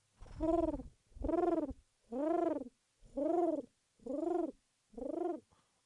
Human impersonation of a dove. Captured with Microfone Condensador AKG C414.